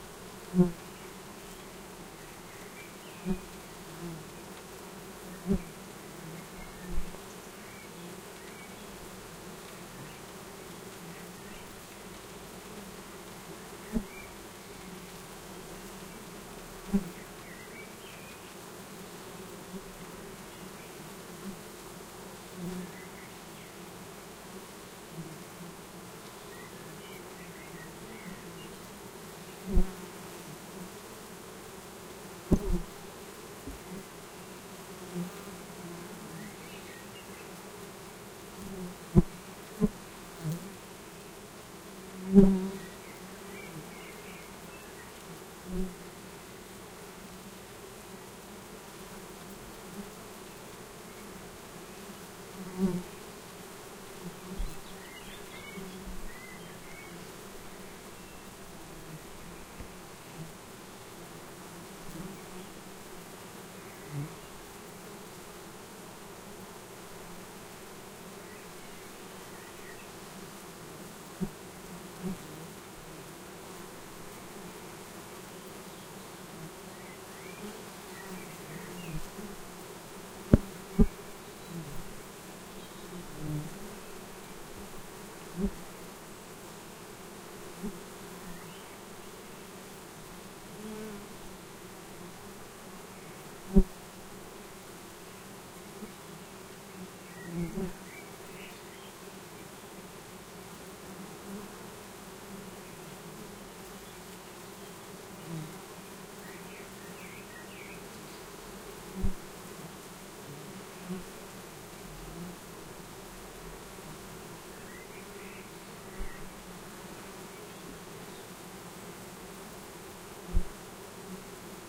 Bee Hive 1
Domestic Bee Hive recorded with 2 Rode NT-5 microphones.
ambience, animals, bee, field-recording, flying, hive, insects, nature, swarm